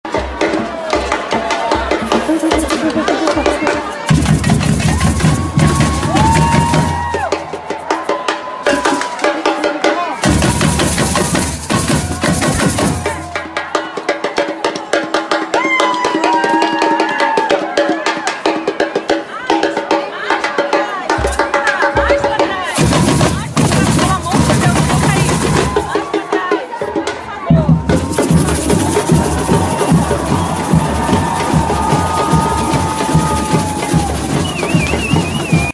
street carnival 2
street carnival music